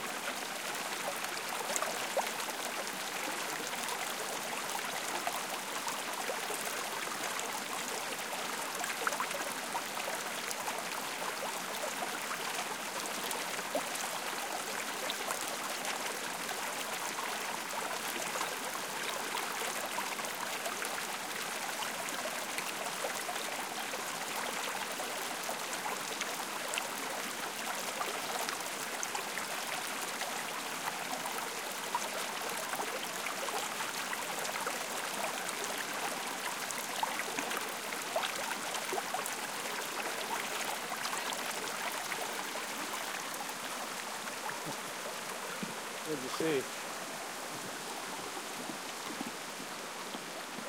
All in pack recorded today 3/29/14 on the Cataract Trail on Mt. Tam Marin County, CA USA, after a good rain. Low pass engaged. Otherwise untouched, no edits, no FX.
babbling, brook, creek, flowing, gurgle, liquid, stream, water